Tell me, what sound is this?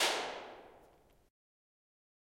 Capricorn IR #1
A couple of Impulse Responses from the Capricorn Caves in Central Queensland, Australia, nice for anything
Australian-Caves, Cave-Acoustic, Cave-Impulse-Response